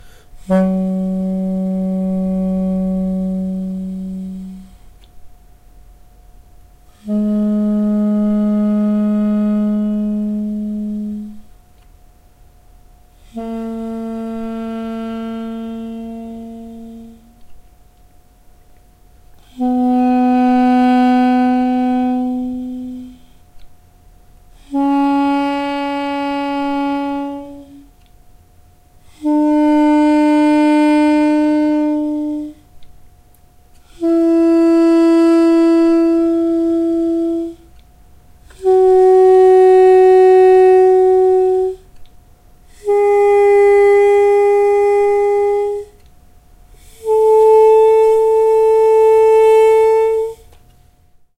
Scale by Duduk - Armenian double-reed wind instrument
Recorder: Zoom H4n Sp Digital Handy Recorder
Studio NICS - UNICAMP
escala, armenia, duduk, double-reed